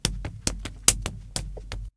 O som representa uma pessoa socando outra, e foi gravado com um microfone Condensador AKG C414
4maudio17, violence